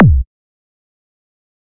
TRANCEY KICK
kick drum techno. these samples are compressed some with reverb or reverse reverb eq job done. to make some grinding dirty techno.
techno, kick